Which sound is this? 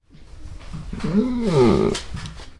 Igor Mmmm
A throaty grumble from our Alaskan Malamute, Igor. Recorded early morning in our bedroom with a Zoom H2, using the internal mics.